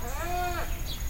Single call from a Wrinkled Hornbill. Recorded with an Edirol R-09HR.
jungle, aviary, rainforest, birds, hornbill, exotic, bird, tropical, zoo